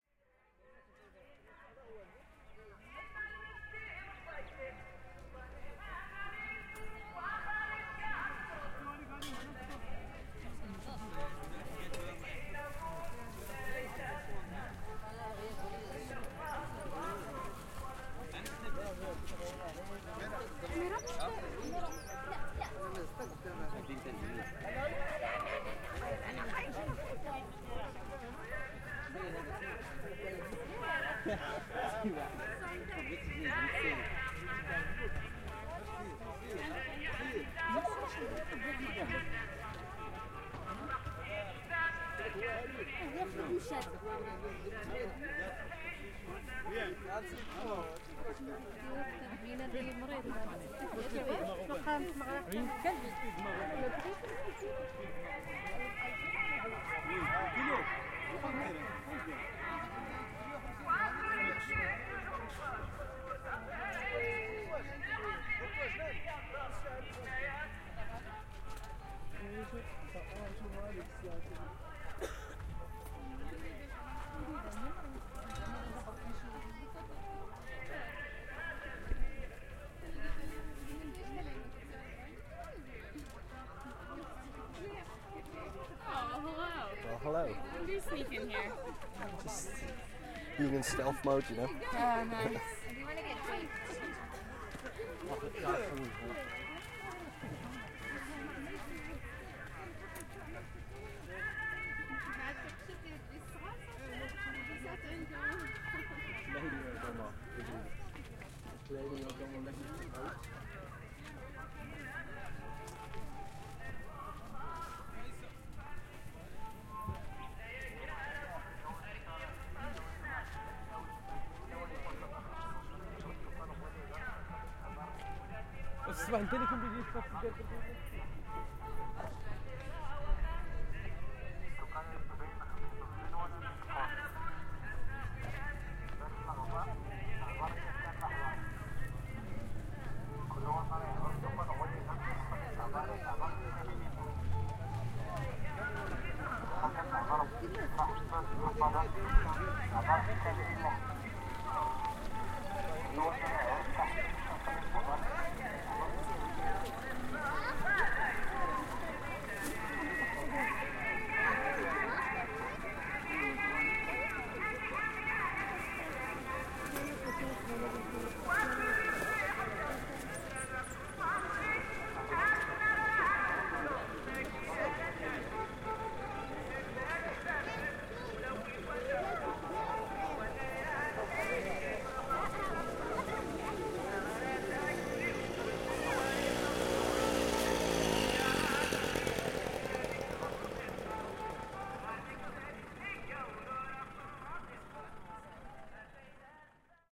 open air market morocco 1
Recording of a open air market in morocco, on a hot sunny day. Walking around and hearing lots going on, almost chaotically
ambiance, ambience, ambient, atmosphere, field-recording, market, marketplace, morocco, noise, people, soundscape, talking